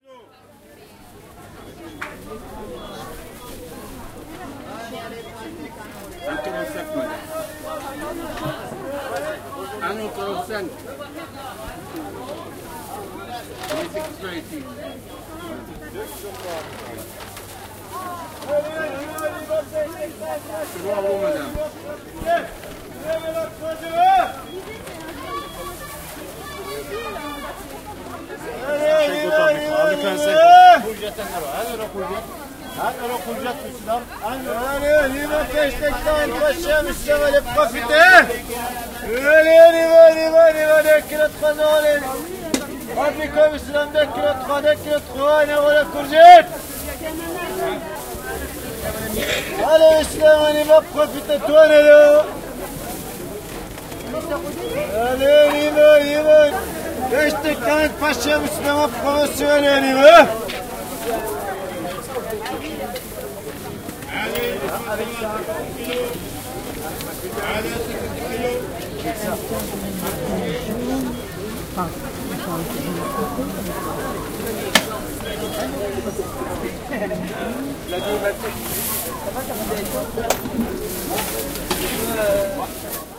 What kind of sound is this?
ambiance marche 3
A market place outside of Paris, voices of buyers,fruits and vegetable sellers, typical french atmosphere. Recorded with a zoom h2n.
french, market-place, France, Paris, language